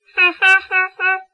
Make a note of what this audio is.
weird voice I do
funny, voice, weird